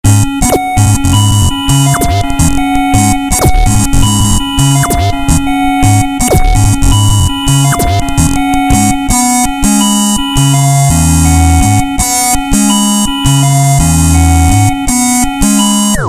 gnidus - hyun91
I simply took guitar sample and edited it, then i added an interesting sound sample and made sweet melody with some plugins. The soft which i used is just FL studio. This sound is about 2010.